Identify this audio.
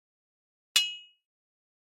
Hitting Metal 06
dispose, garbage, hit, impact, iron, metal, metallic, rubbish